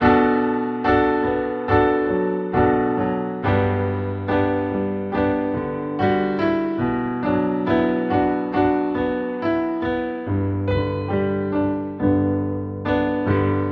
140bpm Piano Solo 11.Apr 8
For use at your leisure. I make most of them at 140 bpm so hopefully one day they make their way into dubstep.
Chop/splice/dice/herbs and spice them, best served piping hot, enjoy.
Fondest regards,
Recorded with Logic Pro 9 using the EXS24 sampler of the steinway piano (Logic Pro default) with a touch of reverb to thicken out the sound.
bpm, dub, solo, 140, piano, steinway, improvisation, dubstep, loop